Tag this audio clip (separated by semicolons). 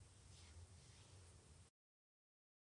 cruzar; JUNTAR; BRAZOS